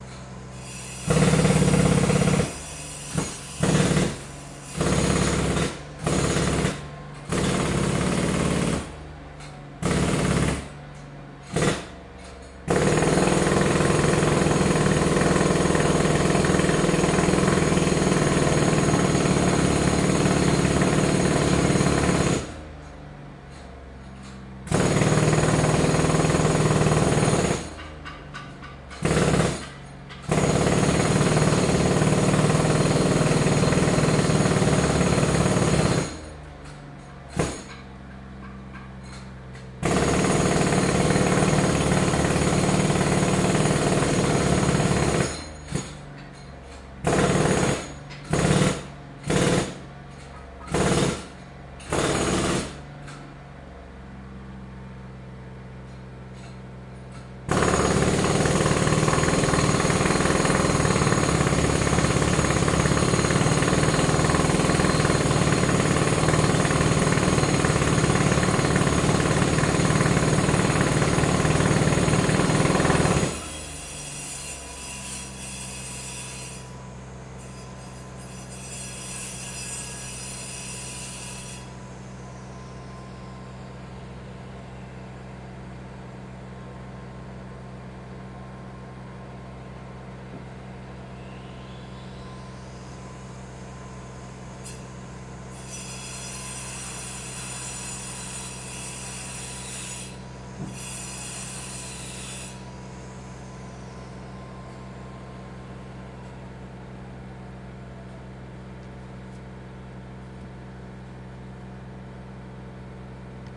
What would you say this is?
Sounds of demolition of the newly laid concrete base with jackhammer on diesel powered compressor, sounds of bricks being cutted by circular saw. Daytime, residential area of Minami Magome. Recorded at approximate 7 meter distance on Tascam DR-40 with self made wind shield, manual level, no low cut filter. No editing. 22nd of June 2015
builder, concrete, construction, construction-site, deconstruction-work, demolish, demolition, jack-hammer, residential-area, saw, stone